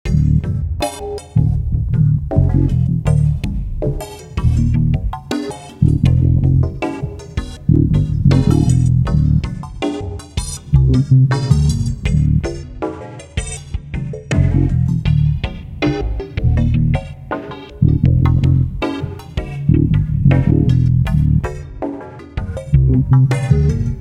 An 80bpm bass and drum loop, my fender precision thru an envelope filter and a simple drum program tweaked to death with filters..get down with your bad self
80bpm, loop, funky, drum, bass